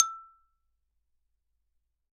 Kelon Xylophone Recorded with single Neumann U-87. Very bright with sharp attack (as Kelon tends to be). Cuts through a track like a hot knife through chocolate.
kelon, samples, mallets, xylophone